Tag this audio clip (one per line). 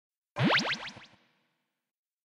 Appear
Cartoon
Effect
Plop
Sound